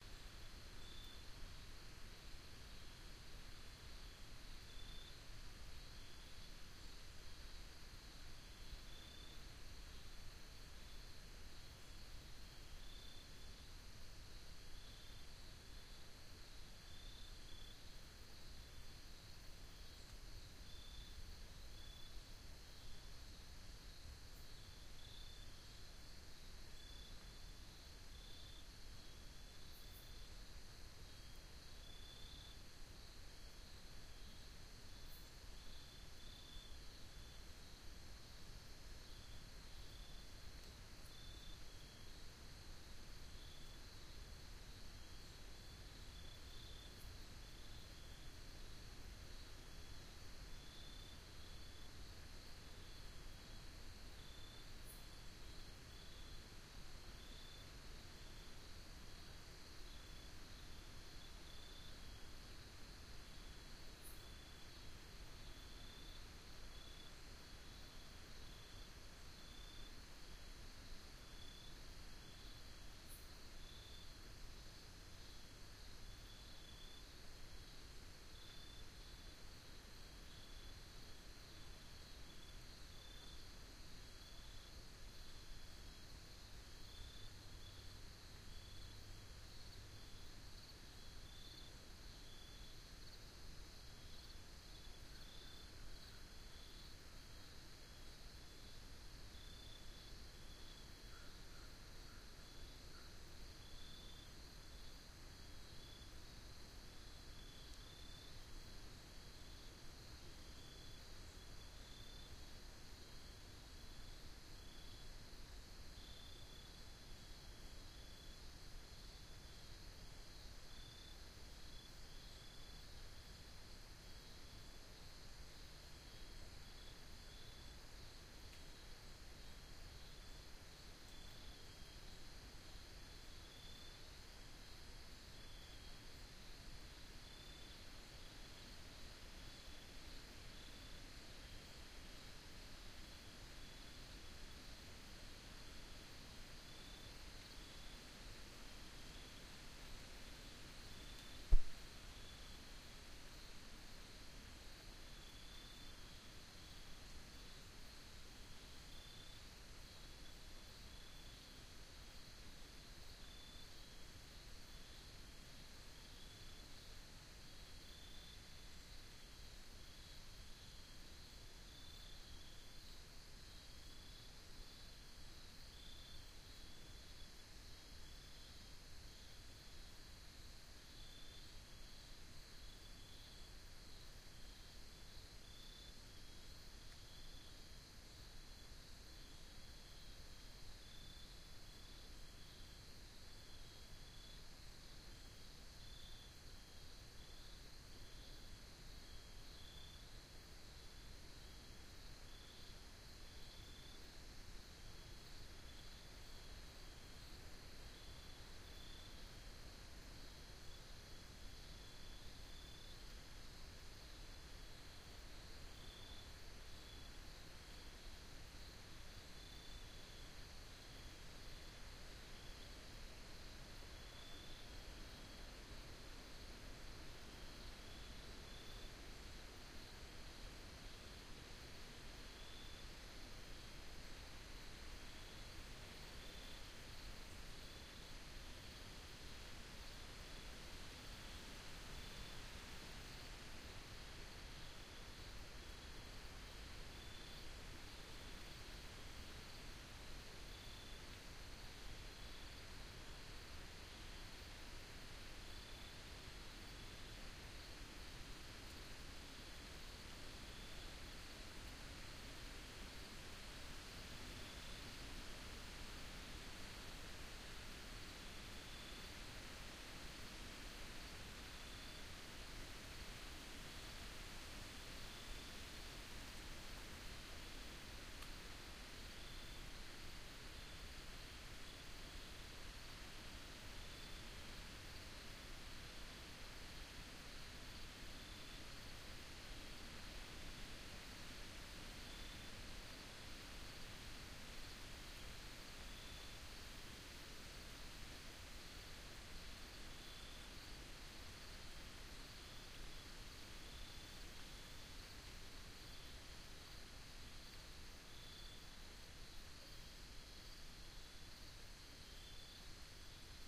Shelter from the wind
WARNING: This is much quieter than the other tracks as it is in a sheltered location. The other tracks are recorded in the open, and they're a lot louder.
Recorded on a cold, wet september day during a break in the rainstorm. There was a chilly wind blowing, scattering droplets from the trees. Despite the rain, the creekbed was very low in water with just a few small pools. There were frogs singing in the woods, but there was enough wind to make recording difficult.
I found a sheltered location under a big maple tree, near a small pool, and sat, huddled against the cold, to record while a small water snake hunted in the pool.
Toward the end of the recording, a wind picks up, hissing through the forest on the hill above me.
Recording date: Sept 8, 2012, 3:53 PM.